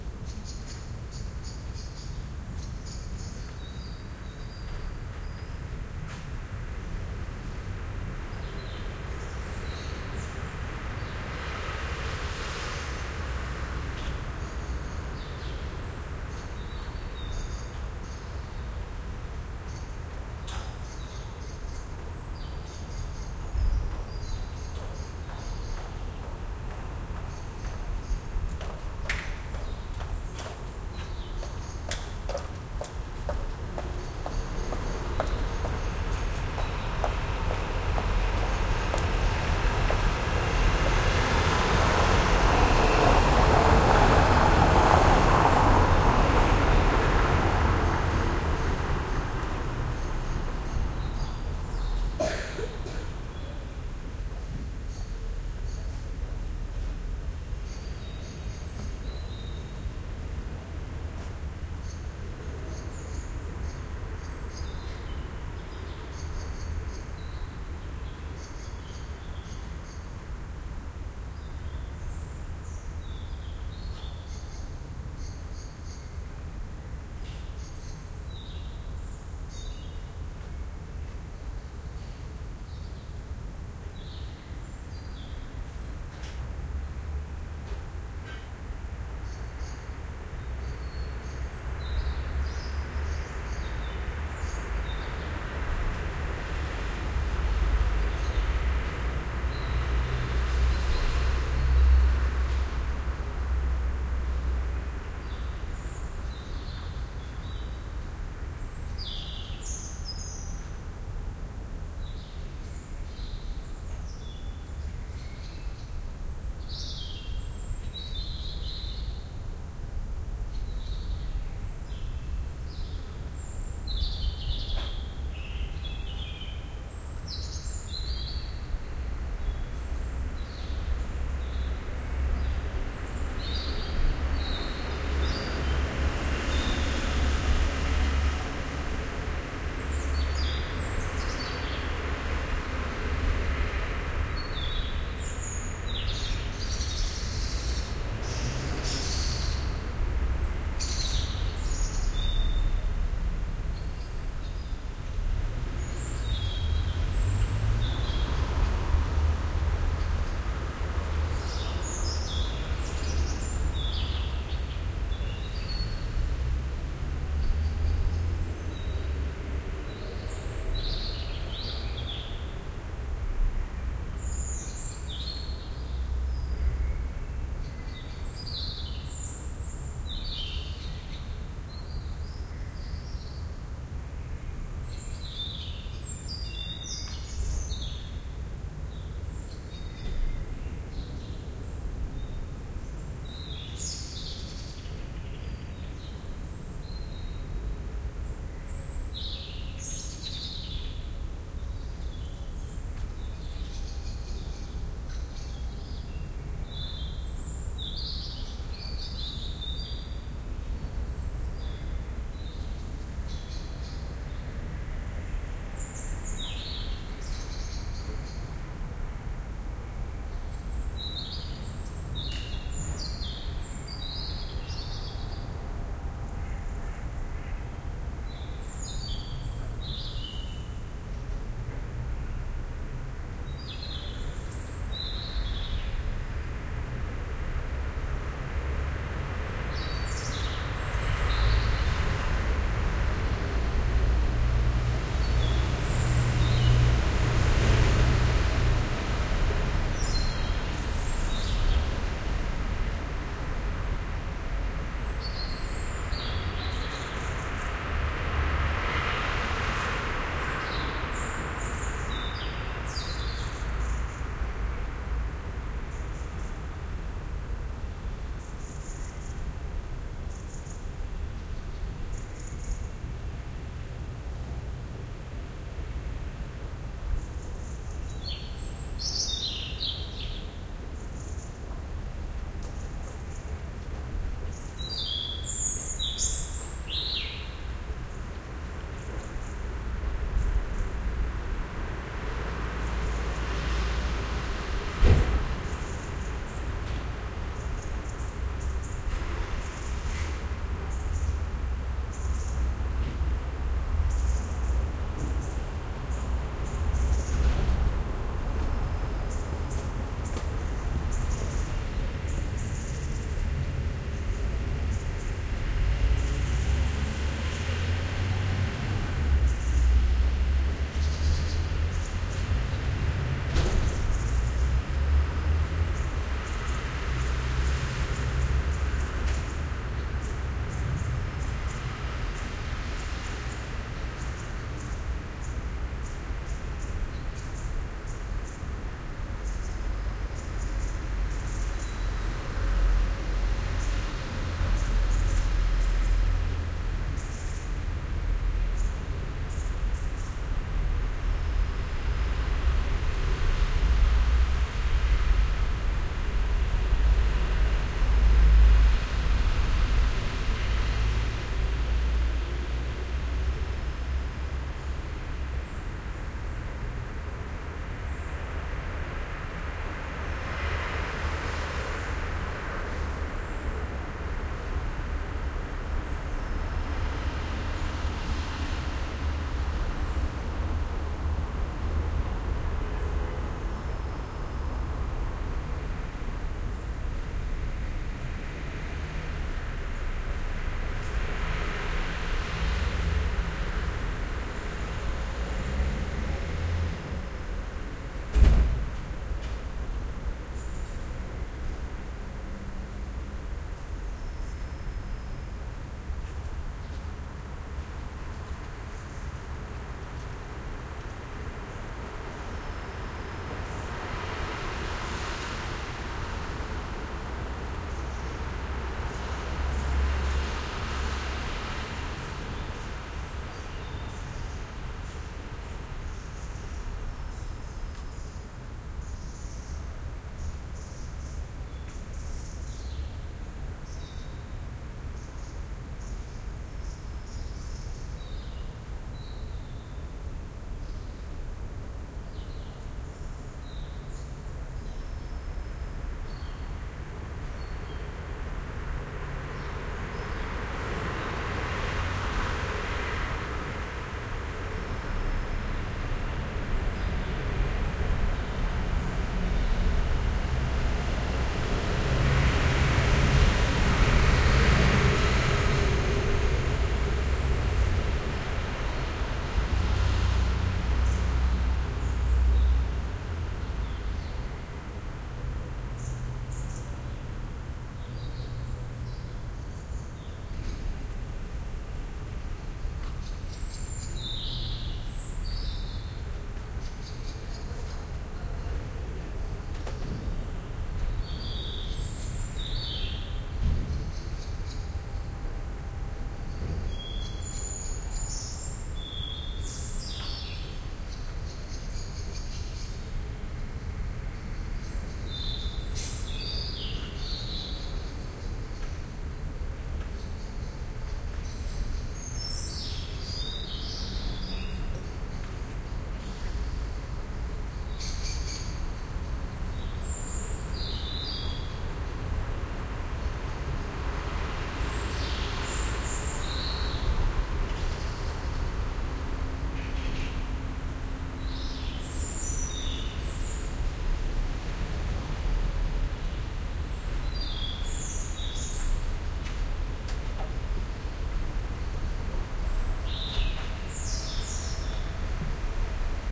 A morning, when it was still dark. A small side street in town with a
Robin singing, some cars driving over the cobbled street and a woman
walking by. Sometimes tracks like this tell a story. Soundman OKM II with the A3 device and a Sharp Minidisk MD-DR 470H recorder I used.
cars, city, cobblestones, field-recording, highheels, robin, street, traffic